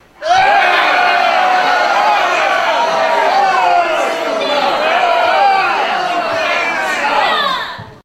Angry Mob
Recorded with Sony HXR-MC50U Camcorder with an audience of about 40.
Crowd, Angry, Mob, Audience